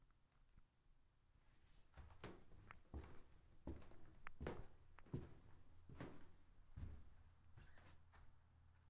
Walking up a small set of carpeted stairs.